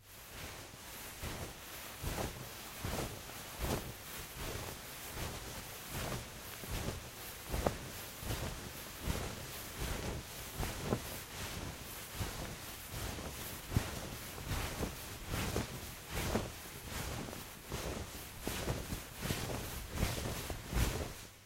Footsteps in ball gown
The sound of a heavy dress moving along with footsteps.
Recorded with AT2035
footsteps, moving, movement, textile, dress, cloth, taffeta, ball-gown, walk, tulle, rustle, fabric, clothes, walking, rustling, clothing